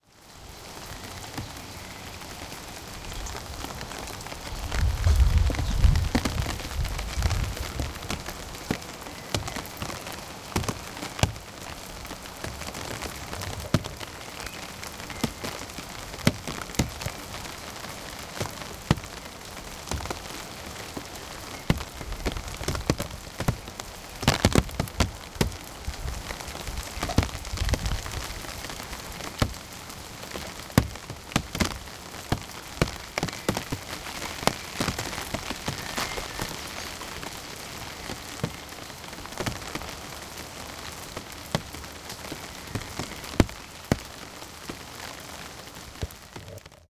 rain under umbrella
Rain hitting an umbrella.
outdoors, rain, raindrops, raining, umbrella